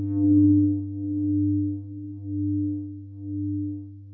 modified dtmf tones, great for building new background or lead sounds in idm, glitch or electronica.
dtmf, eerie, electronica, glitch, idm